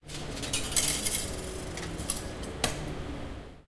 Coins change machine
Sound of coins dropping in a payment machine in a car park.